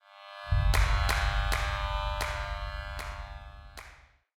Clappic 2 - Epic Clap Ceremony Symbol Sound
holy, military, hall, ceremony, sci-fi, energy, space, fantasy, level, claps, alien, levelup, charge, clap, army, epic, dancers, echo